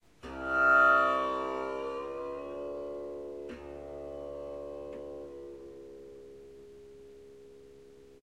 Tanpura tune detune 02
Snippets from recordings of me playing the tanpura.
Tuned to E flat, the notes from top to bottom are B flat, E flat, C, low E flat.
In traditional Indian tuning the root note in the scale is referred to as Sa and is E flat in this scale The fifth note (B flat in this scale) is referred to as Pa and the sixth note (C) is Dha
I noticed that my first pack of tanpura samples has a bit of fuzzy white noise so in this pack I have equalized - I reduced all the very high frequencies which got rid of most of the white noise without affecting the low frequency sounds of the tanpura itself.
Please note this is the tanpura part of an instrument called the Swar Sangam which combines the Swarmandal (Indian Harp) and the Tanpura, it is not a traditional tanpura and does sound slightly different.
bass, ethnic, indian, swar-sangam, tanbura, tanpura, tanpuri